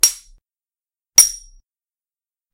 glass hit
hitting an empty bottle